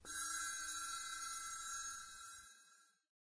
percussion, bell cymbal, sizzle